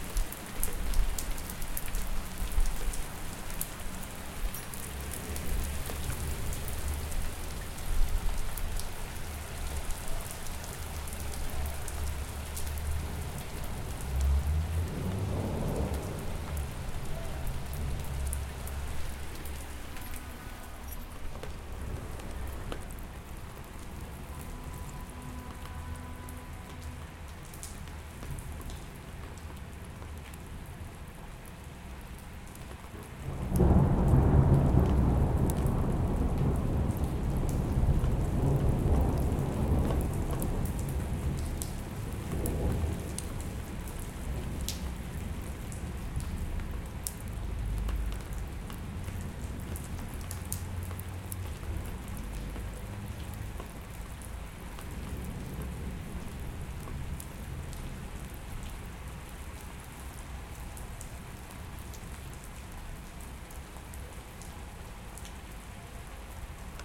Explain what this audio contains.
rain near city far
you can hear the city sounds in the deep, and rain in front